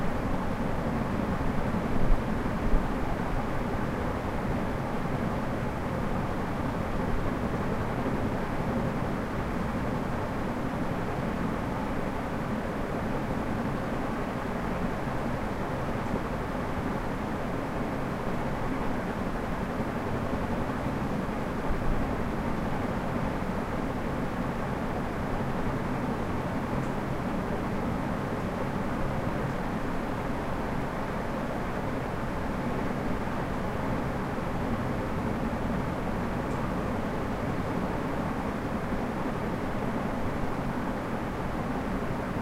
ceiling fan high speed smooth
ceiling, fan, speed